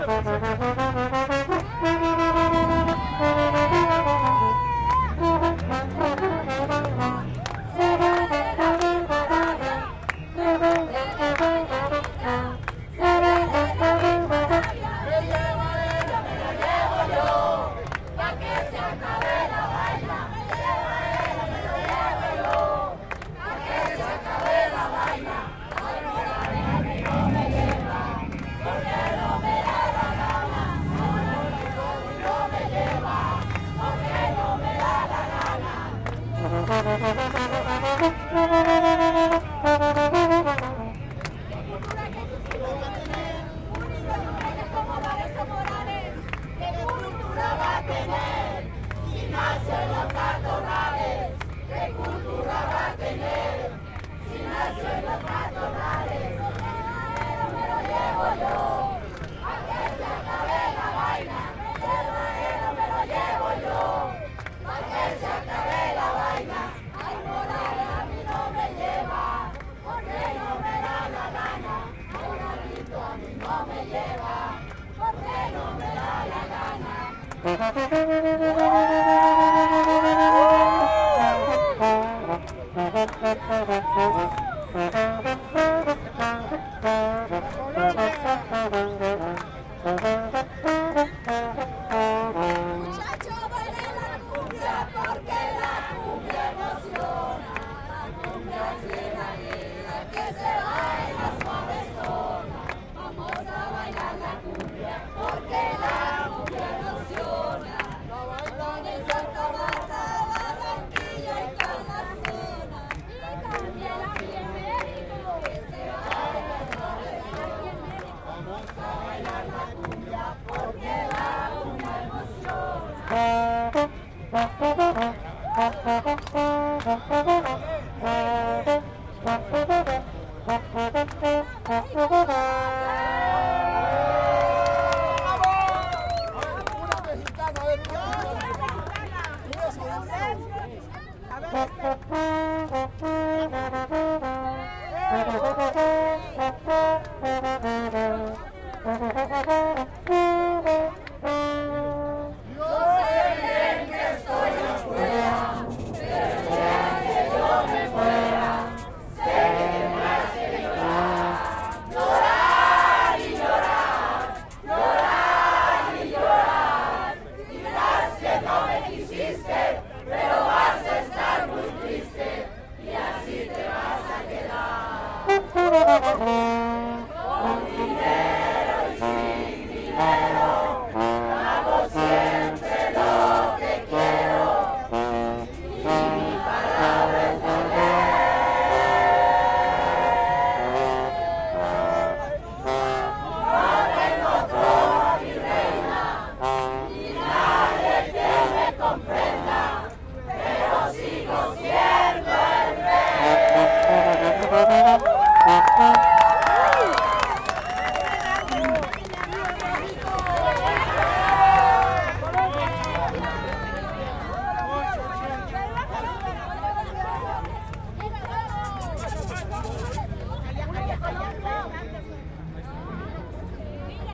Trombón Homenaje Gabriel Garcia Marquez II
A lot of people singing in the funeral of Gabriel Garcia Marquez, Colombian Writer
Street-music, Funeral, Trumpet, Marquez, colombian, Ambiental, Party, Gabriel, Musician, Trombon, Music, Writer, Garcia